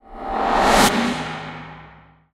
Swoosh test A
Just something I came up with while playing around with this sample:
Reversed, layered, cut and otherwise processed in Cubase 6.5.
It's always nice to hear what projects you use these sounds for.
One more thing:
Please check out my pond5 and Unity profiles: